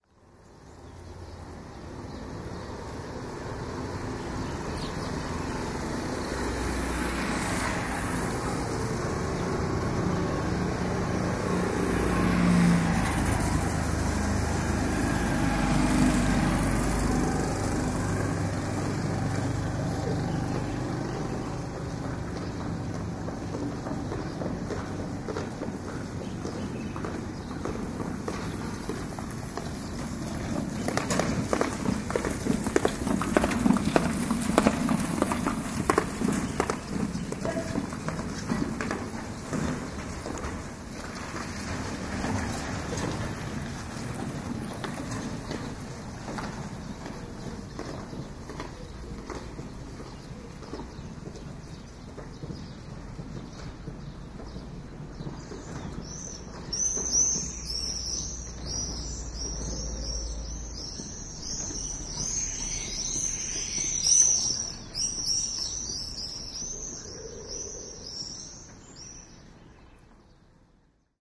This recording was made in Medina, Marrakesh in February 2014.
Binaural Microphone recording.